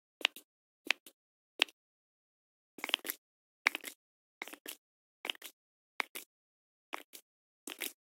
Sound of snapping fingers